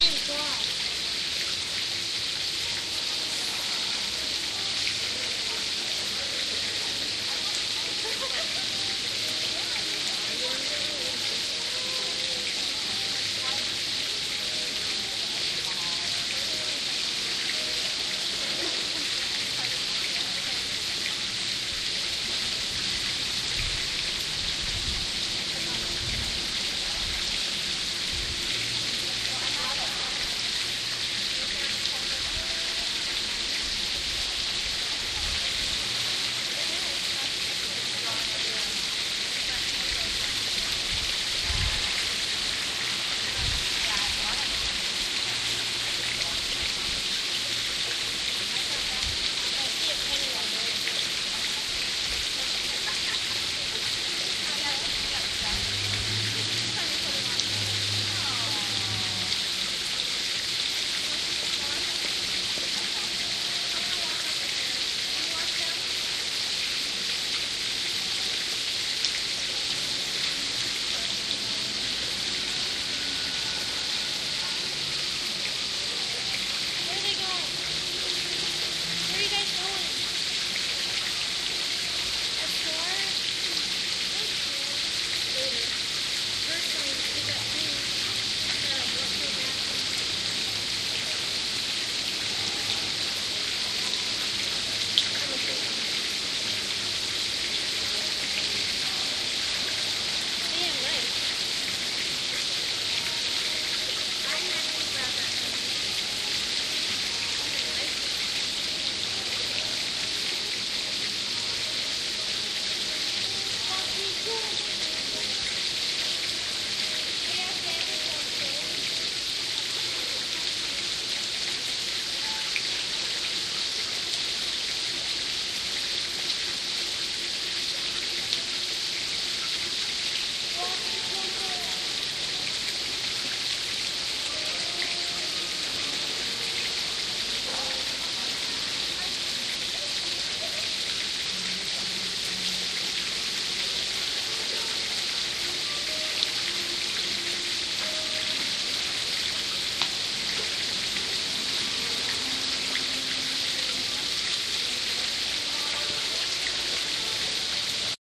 movie stereo fountain
A trip to the movies recorded with DS-40 and edited with Wavosaur. In between waterfalls of a fountain outside the theater before the movie.
ambience, field-recording, fountain, outside, water